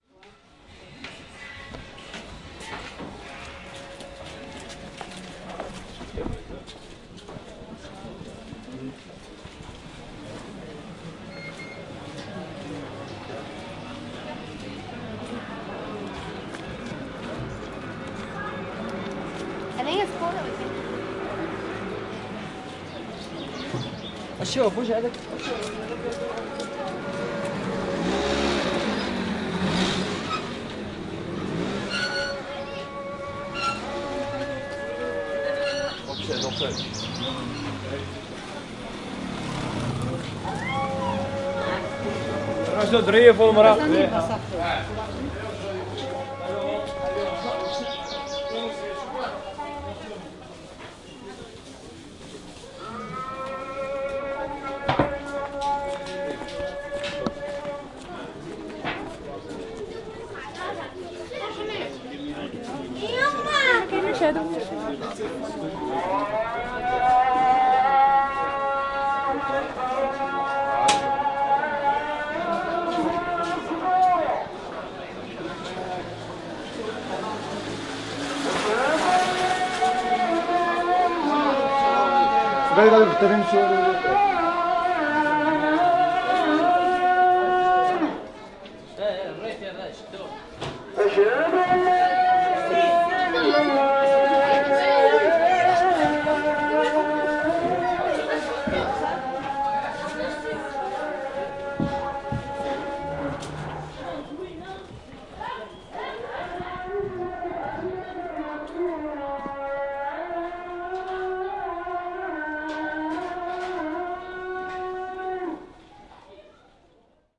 This recording was made in Medina, Marrakesh in February 2014.